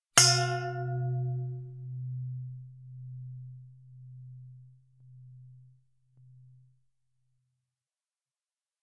A brass bowl struck with a wooden striker. Rode NT-4 > FEL battery pre-amp > Zoom H2 line in.
ding, bell, mono, ring, percussion, bowl, brass, clang